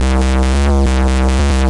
140 Derty Jungle Bass 02
dirty grime bass
drums, filter, free, guitar, loops, sounds